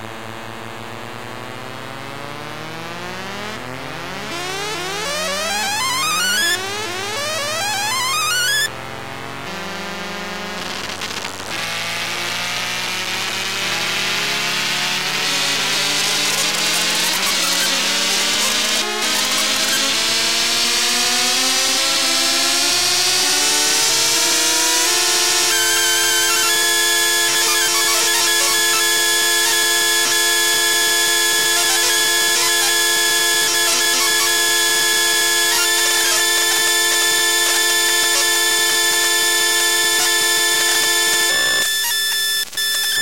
Sample is a snippet from a longer recording of a circuit bent Casio CT-420. Compression added in Goldwave to reduce peaks.